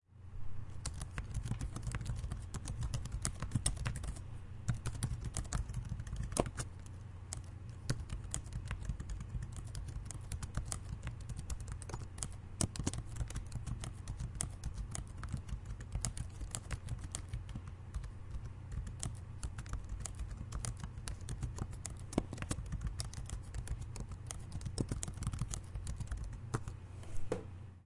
Computer Keyboard Keys
Elaine, Field-Recording, Koontz, Park, Point, University